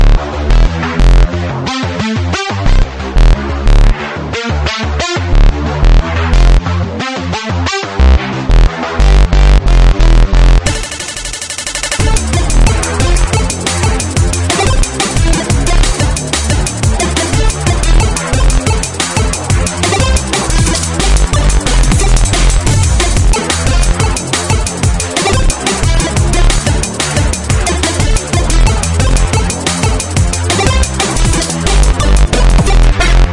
FrenzyFrotzel Loop 180bpm 25bars
180bpm loop. 25bars. Distorted synth bass, cheap dnb beat and chiptune-ish sound involved.